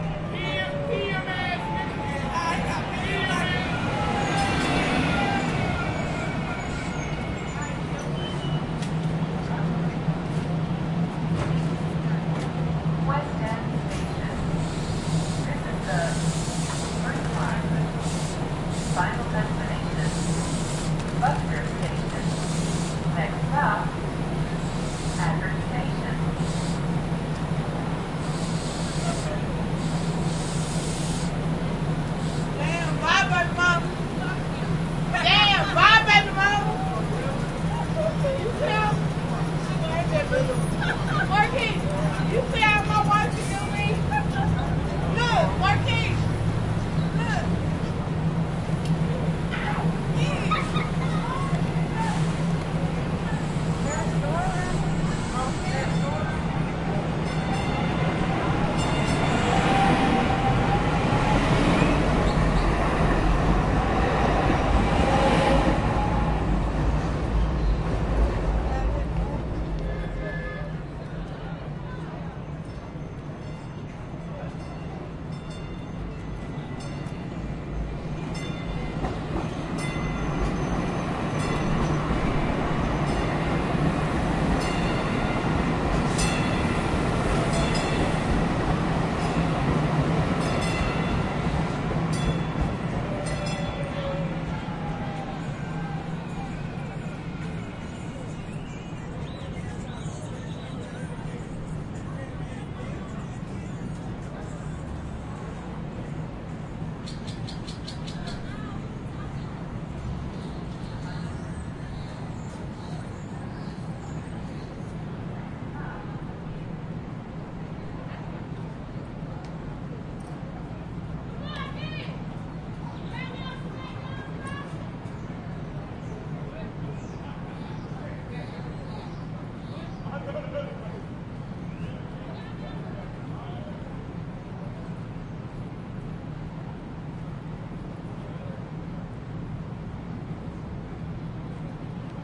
Part of the Dallas Toulon Soundscape exchange project. Recorded around noon on April 11th, 2011 at West End Station in Dallas. A train arrives, people are heard shouting, a voice plays through a loudspeaker giving the destination of the train. People board the train and it departs, leaving the scene much quieter. Temporal density of 3. Polyphony of 4. Busyness of location 4. Order-chaos of 5
station, shouting, train, arrival, west-end, departure